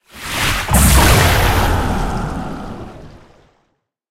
Water Elemantal Magic Skill
elemantal, game-sound, magic, magical, magician, rpg, skill, spell, water, wizard